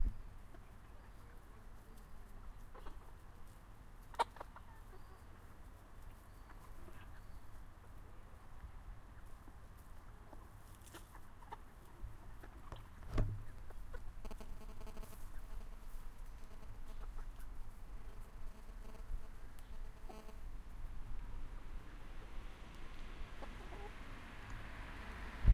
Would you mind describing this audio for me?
Chicken sounds 3
birds, Chicken, clucking